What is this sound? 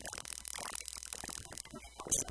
vtech circuit bend010
Produce by overdriving, short circuiting, bending and just messing up a v-tech speak and spell typed unit. Very fun easy to mangle with some really interesting results.
broken-toy, circuit-bending, digital, micro, music, noise, speak-and-spell